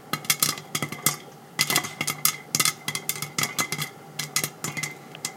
Popping corn in a covered metal saucepan. Sound recorded with the Mini Capsule Microphone attached to an iPhone.
frying, popcorn, popping, metal, kitchen, saucepan